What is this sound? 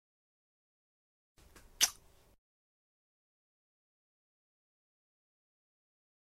A simple peck on the cheek - appeared in Ad Astral Episode 4 "DREAM GIRL".
kiss, cheek, peck